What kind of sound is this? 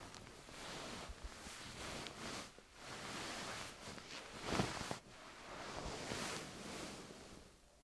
Moving in Bed
The movement of someone getting out of bed was recorded. Various movements and actions were executed to result in the final recording.
A Zoom H6 recorded was used, with the XY Capsule, inside a normal room.
Bed, Bed-Movement, Bed-sounds, Moving, OWI, Scuffling